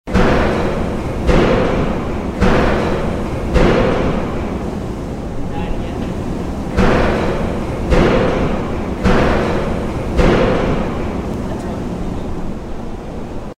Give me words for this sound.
This is the sound of a 3000 pound drop forge coming down in a factory that forges tools
factory, boom, industrial, Drop-Forge